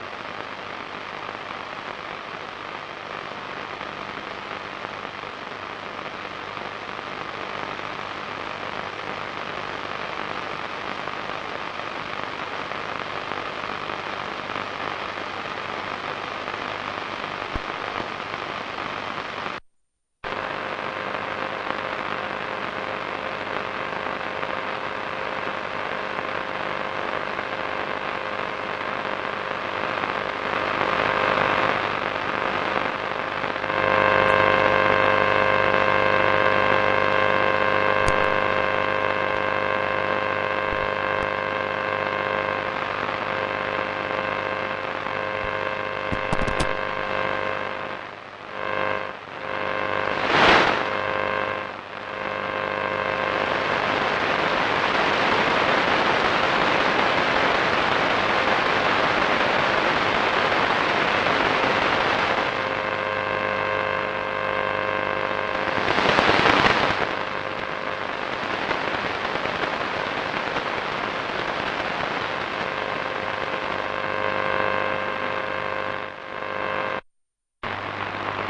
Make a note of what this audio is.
some radiowaves that reached radio in location of Torkkelinkuja 4 A 17 on November 11th 2009.